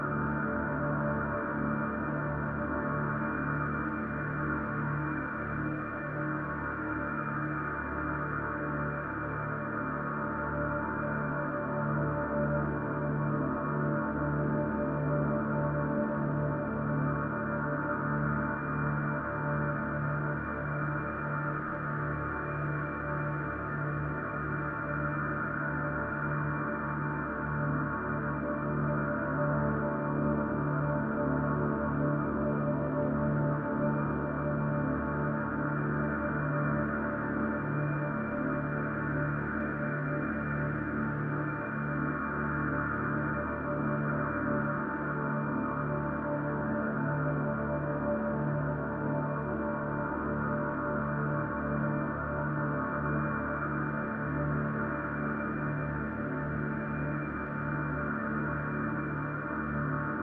Dark Post Apocalyptic Background 1 (-5db)
A post apocalyptic sfx backgound sound creating a dark atmosphere in your project. Perfect for post apocalyptic, scifi, industrial, factory, space, station, etc.
Looping seamless.
ambience, ambient, anxious, apocalyptic, atmosphere, background, chaos, creepy, dark, dark-ambient, deep, dramatic, drone, effect, futuristic, fx, industrial, loop, noise, post-apocalyptic, postapocalyptic, scary, science-fiction, sci-fi, scifi, sfx, sound-design, soundscape, space, strange